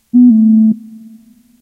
deep pipe
synthetic patch modelled on a wood wind instrument
blow, deep, pipe, wind